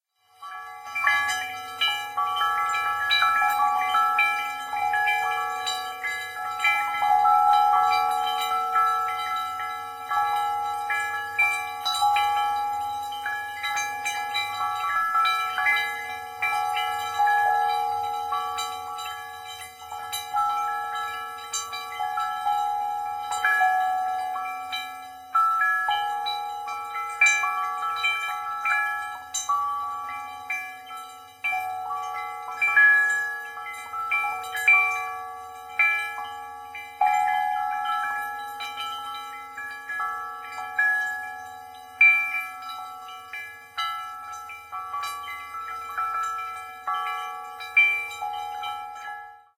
5.1 in file name is nothing to do with surround sound.